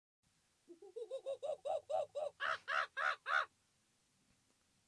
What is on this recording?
Human impersonation of a monkey. Captured with Microfone Condensador AKG C414.
3naudio17, animals, primalscream, Monkey